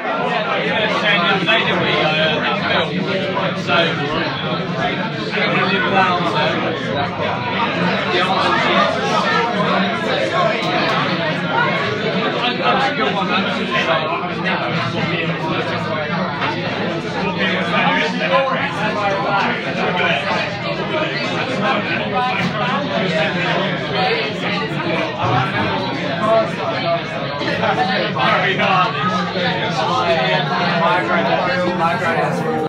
noisy cambridge pub
I needed the sound of a busy pub that was noisy enough that people were shouting to each other. This was recorded in a busy Cambridge pub, UK, on a cold Saturday night in January.